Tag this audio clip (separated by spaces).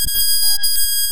data digital glitch mangle noise raw